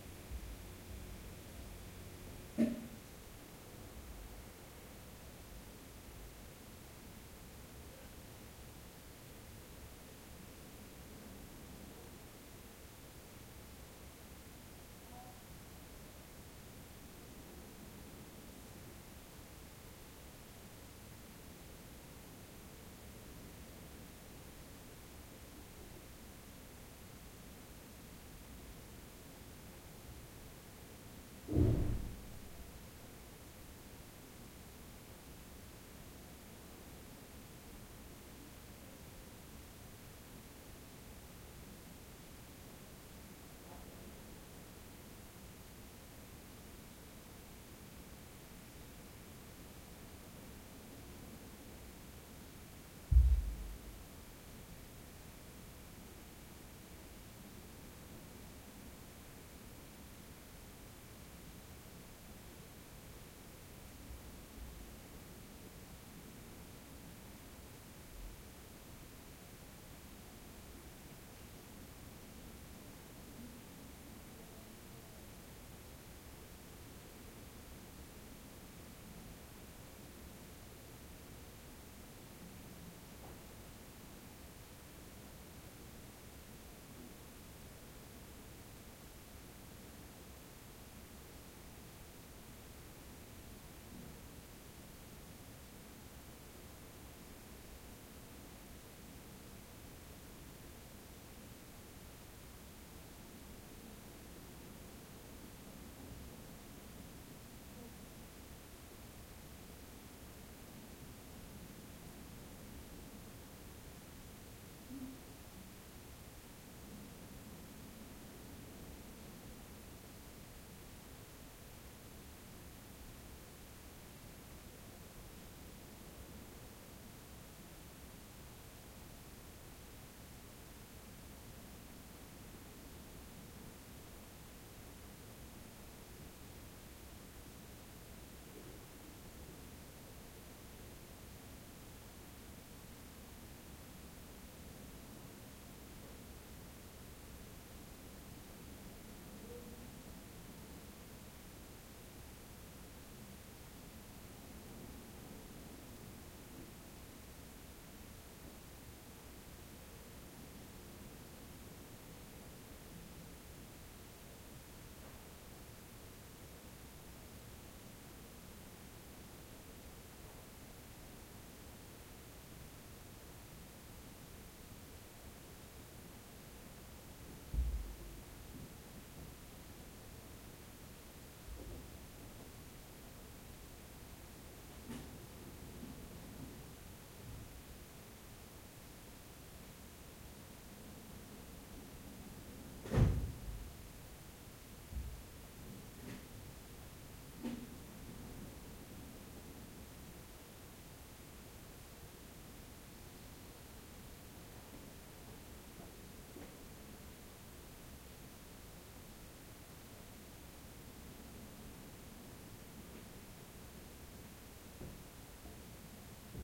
211005 PanormosBeach RoomtoneMorning F
Room sound of a hotel room on a quiet morning at Panormos Beach on the Greek island of Mykonos. The refrigerator and water system can be heard, as well as occasional activity of people outside as well as the seashore in the background.
These are the FRONT channels of a 4ch surround recording.
Recording conducted with a Zoom H2n.